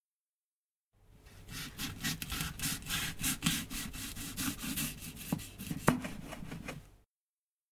Sound of household chores.
CZ, household, Czech, Panska, Pansk, chores